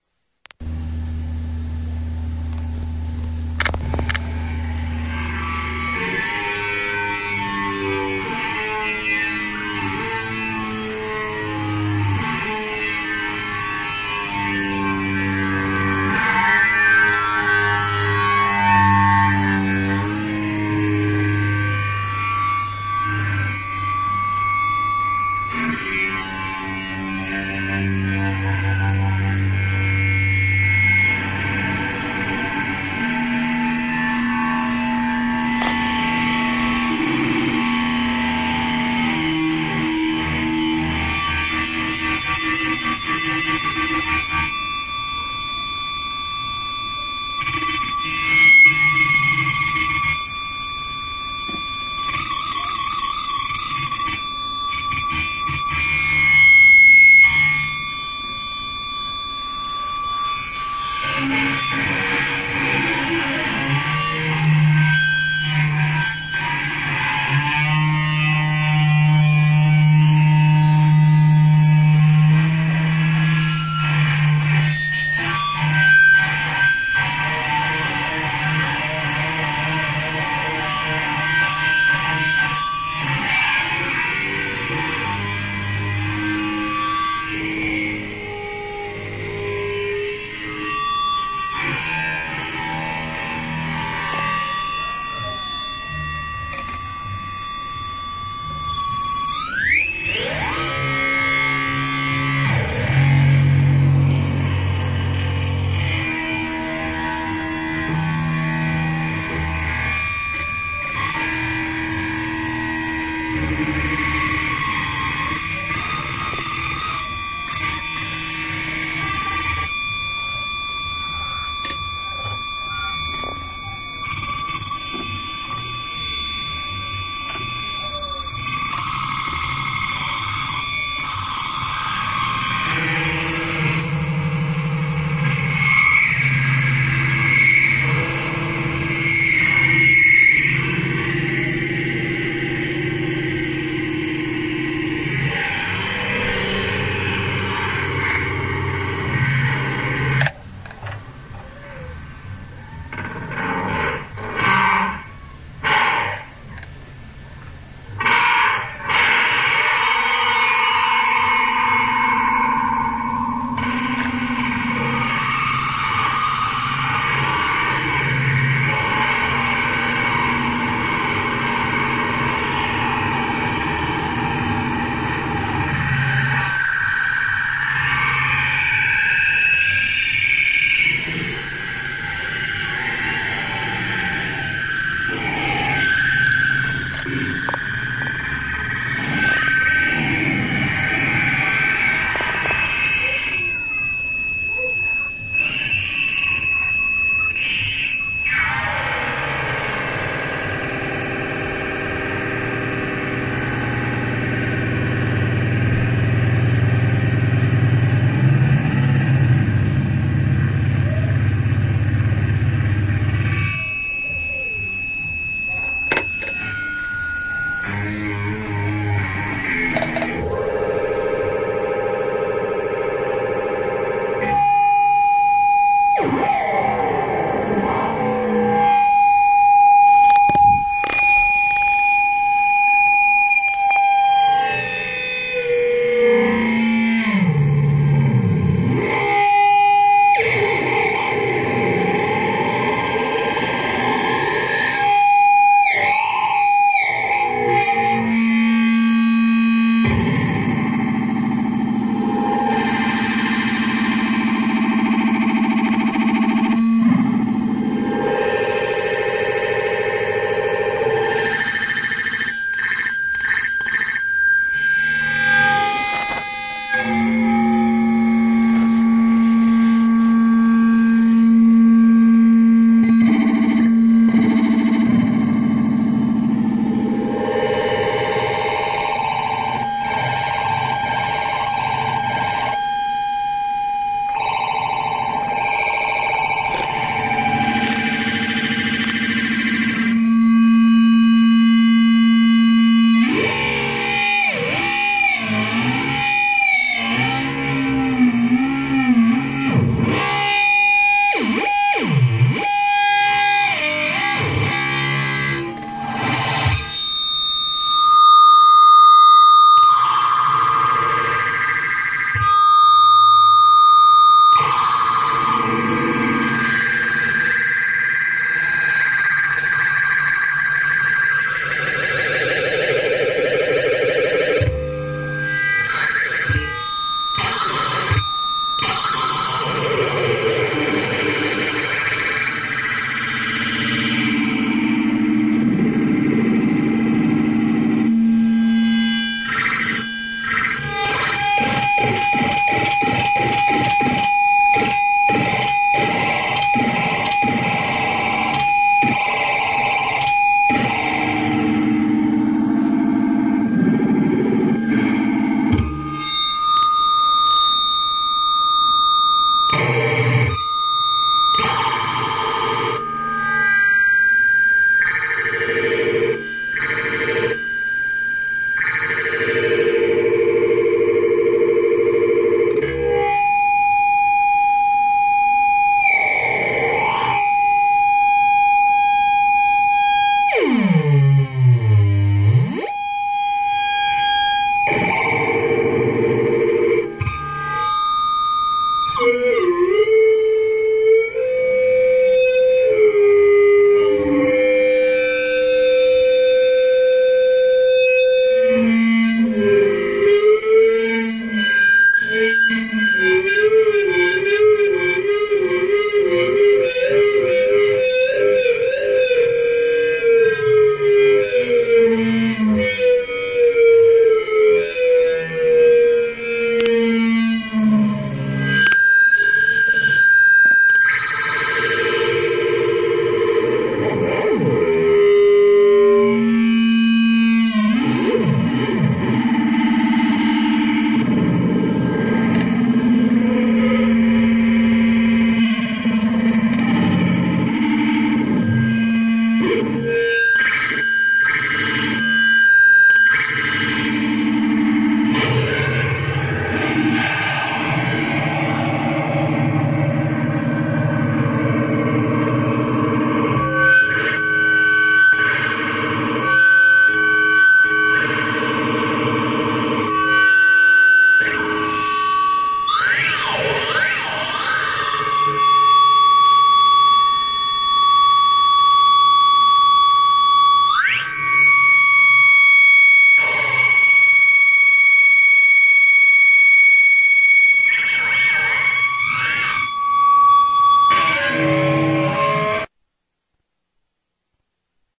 another piece of feedback recorded using a mobile phone, thats why the quality aint all that, pedal used is a electroharmonix polychorus.